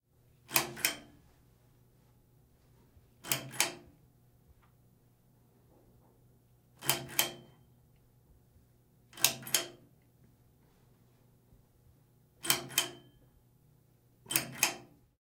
Light pull string
Me pulling a string to turn a light on and off.